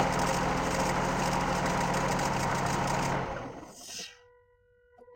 Recorded with ZOOM H1. Turning of the engine of Nissan Note, 1,4.